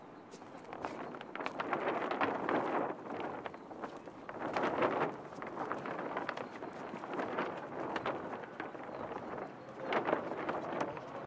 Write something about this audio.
FX - plastico silar movido por el viento